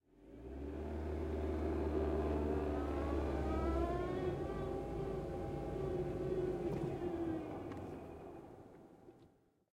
Car ReverseWhine 1
I spent an hour today looking for a decent car reversing sound, gave up and recorded my car doing the same. I was in search of that particular whine you get when you back up in a car or truck rather quickly. Tried to avoid engine sound/ Recorded on my trusty old Zoom H4 and processed (EQ - cut the lows, Compression to bring up the level mostly) in ProTools 10.
Car, whine, reversing, protege, Transmission, interior, backing